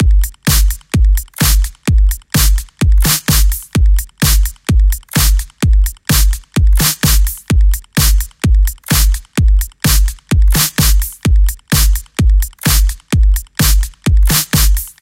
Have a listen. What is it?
Electro beat
simple kick and snare loop with some hats and FX.